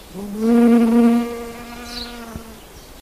honeybee.closeup
Honeybee (Apis mellifera) foraging / abeja melifera visitando flor
andalucia, field-recording, insects, nature, south-spain